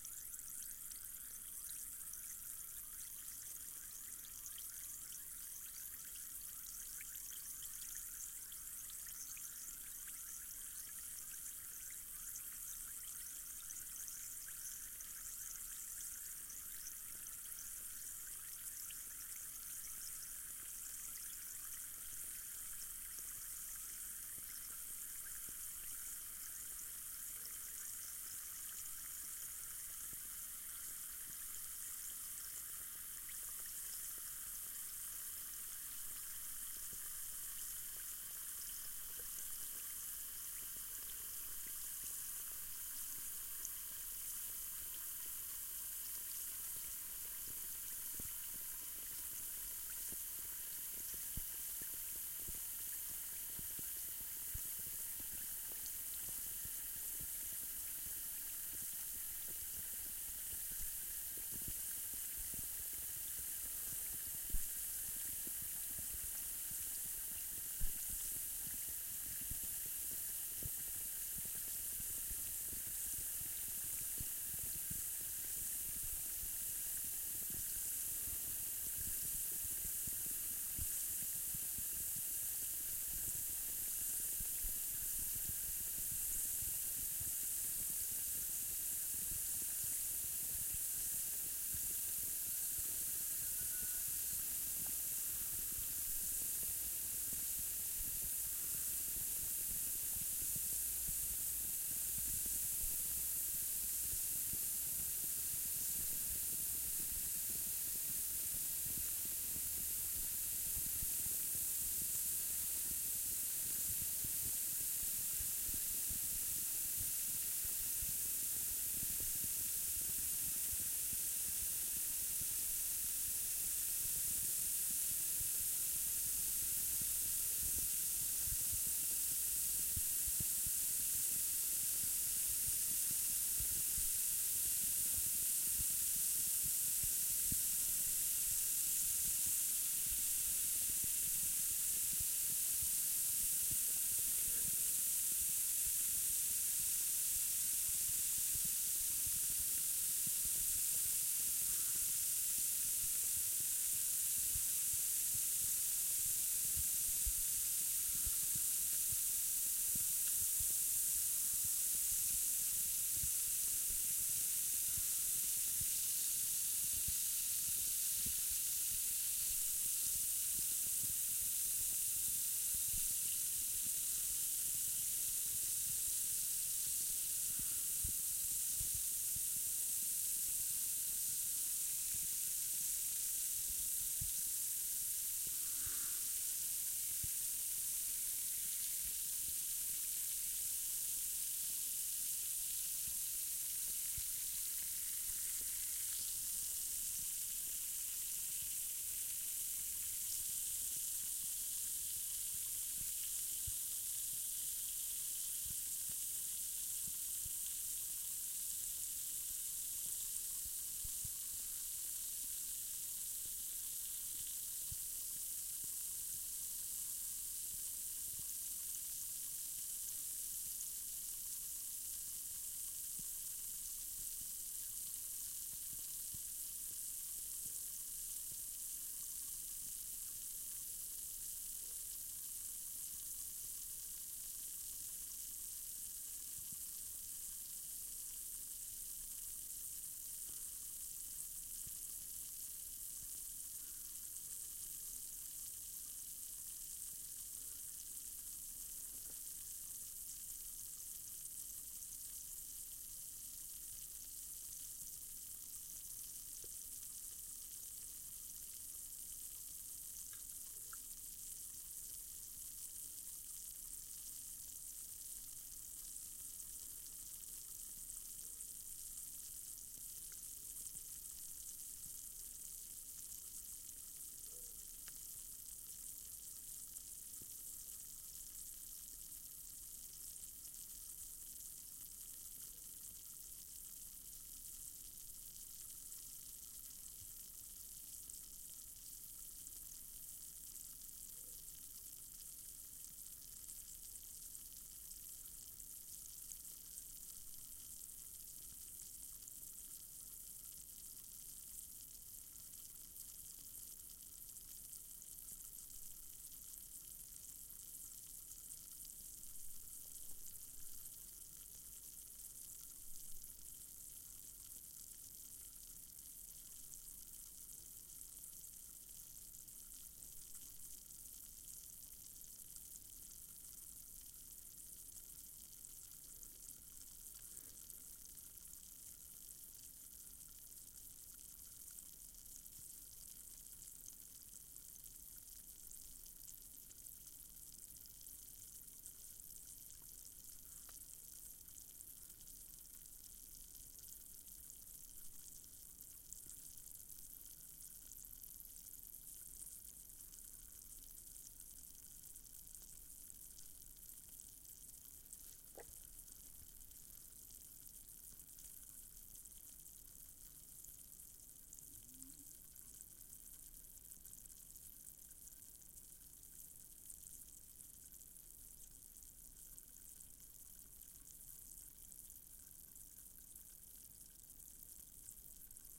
Recorded with: Zoom H6 (XY Capsule)
Throwing some Corenza C tablets into a glass of water. Microphone placed directly above the glass with high gain.
bubbles, bubbling, bubbly, carbonated, chemical, fizz, fizzy, submerged, under-water, Vitamin-C